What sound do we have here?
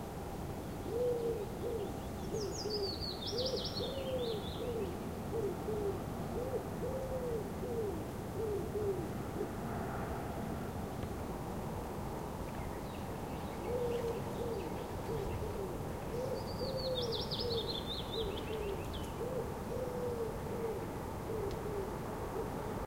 Recording of a Wood Pigeon. Also song from Willow Warbler. Recorded with a Zoom H2.